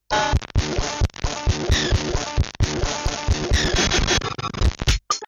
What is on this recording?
casio ct-395 circuit bent